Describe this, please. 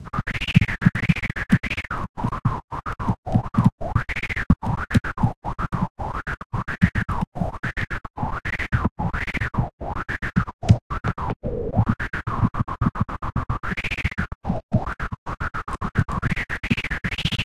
This is a sound effect I made by messing around in Garage Band. It started out with a sound-effect of me typing, but I played around with the FX and now we have something strange.